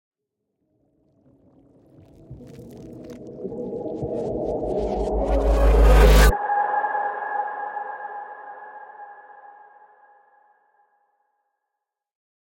The soundtrack to the titular message that plays before a movie.
Created using sampling and granular synthesis.
cinematic, film, composite, movie, buildup, melodic, experimental, soundscape